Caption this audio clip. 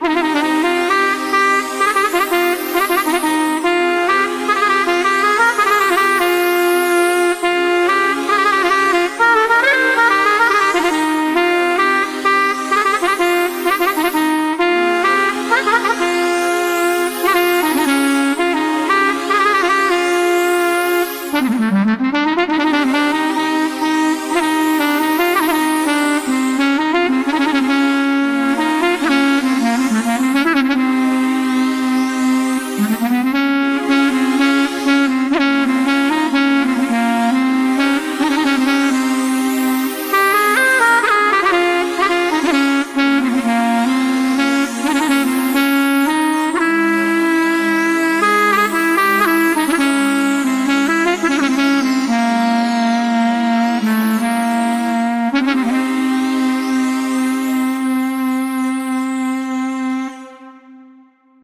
Old Turkish song called Leylim ley played on keyboard by me. Clarinet solo.